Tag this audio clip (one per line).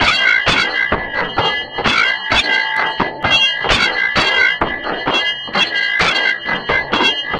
bell
ding
processed
ring
spring